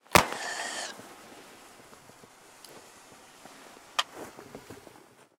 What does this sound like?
flare fire real dull crack
fire, flare, shot